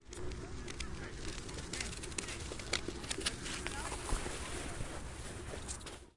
080101-iceskater braking
Ice-skating sound in the Netherlands, on a froze lake. Stereo recording. Highway in the distance. A skater brakes, or at least losses speed, rapidly.
ambiance, distance, frozen, highway, ice, ice-skating, klapschaatsen, lake, nature, winter